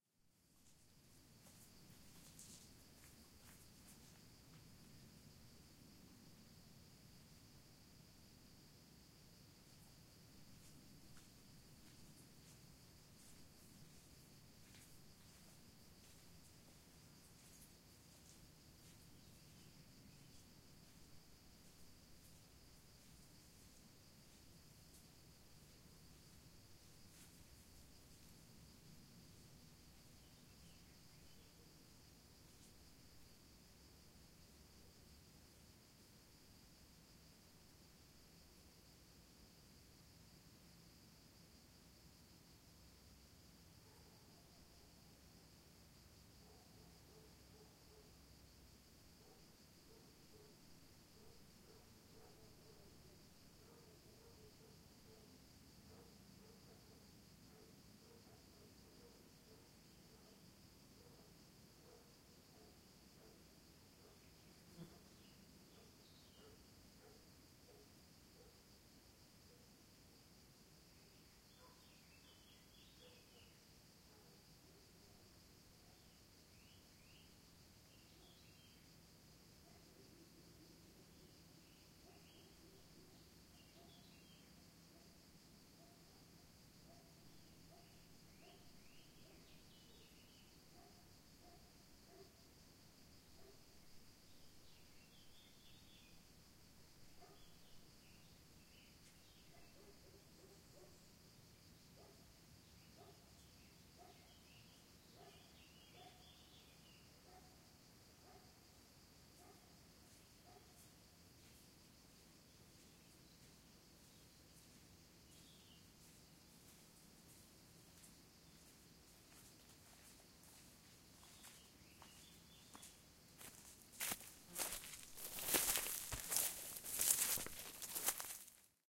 Marata forest ambience - mv88
Field-recording of a Forest in Marata. Some birds sounds and dogs barking can be heard in the distance. Recorded with my mobile phone with a Shure mv88 on July 2015. This sound has a matched recording 'Forest ambience - h4n' with the same recording made at the same exact place and time with a Zoom h4n.
birds, distant, field-recording, forest, marata, nature, shure-mv88